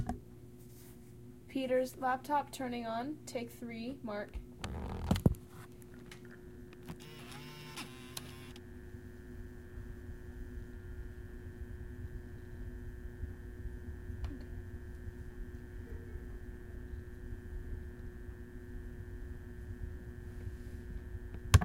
1peter computer3
Stereo, H4N
Carpeted floor, Medium -sized room. No windows. 5 people in the space.
computer, fan, Laptop